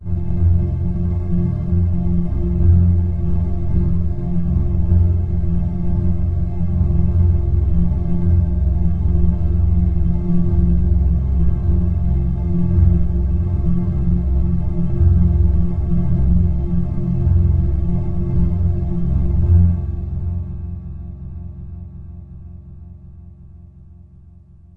double proc phone
Old field recordings originaly made for a friends short film that focussed on the internet and telecommunications. Think I used Reaktor and Audiomulch. I always do my topping and tailing in Soundforge.
processed, recording